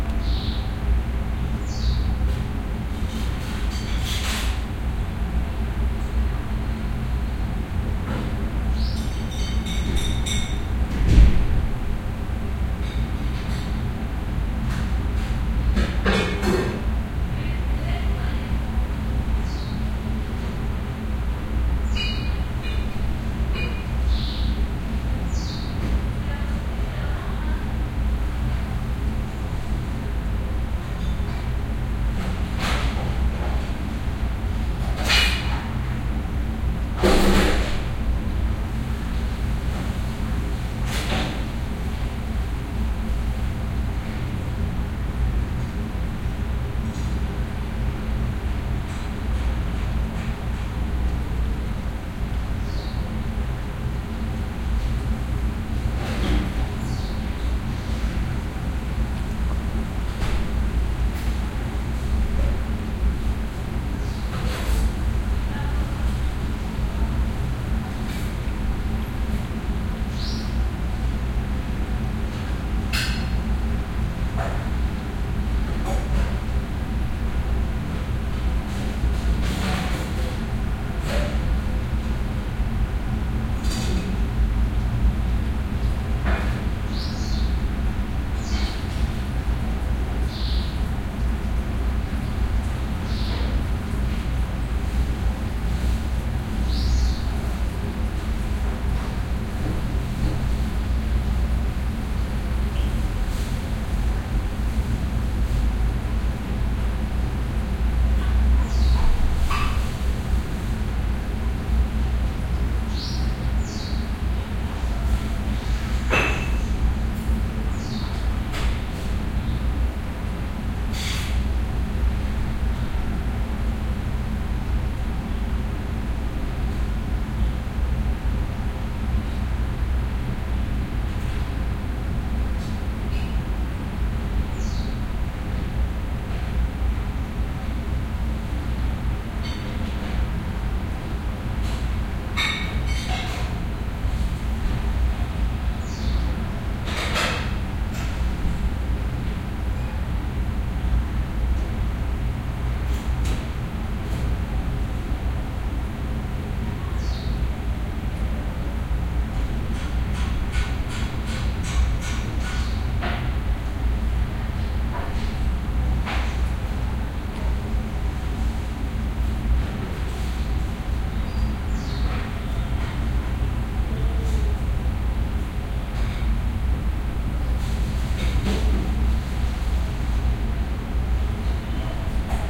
00-barcelona-outside-bedroom-window
Recorded inside, standing at my bedroom window. You can hear people prepairing and eating food above. Some bird calls. Some shouting. Some hum from a ventilator.
barcelona, inner-patio, field-recording, preparing-food